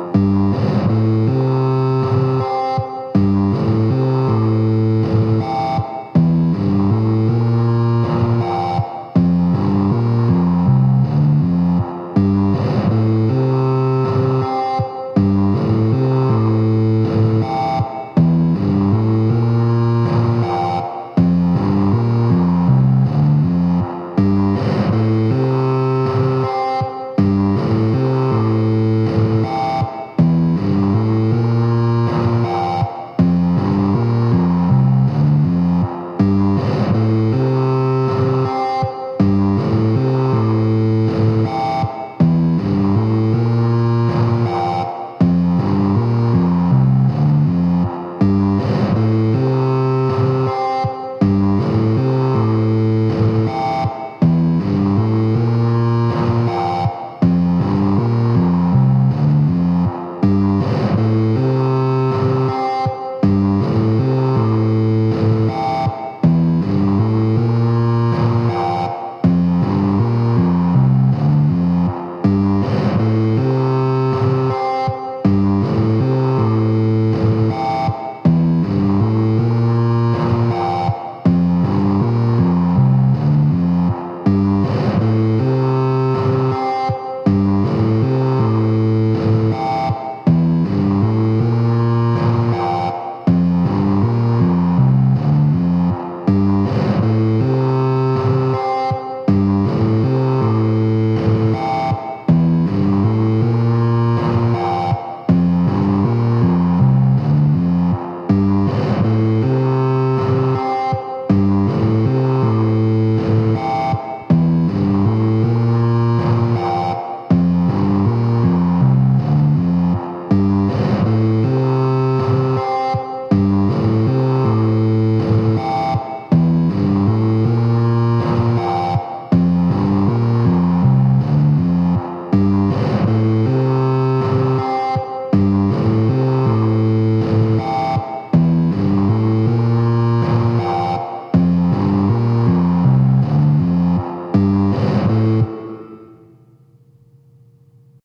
slow deep synth loop with minimal beat.